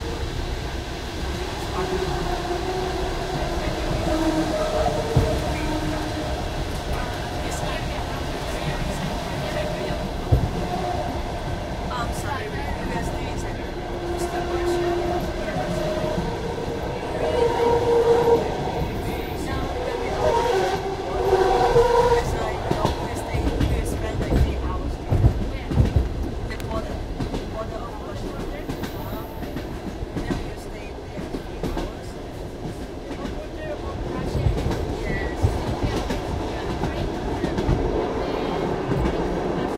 A tuneful train whistle Trans-Mongolian express in northern Mongolia plus train chatter and a little track rhythm.